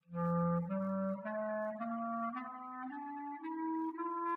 Clarinet dereverb NML approach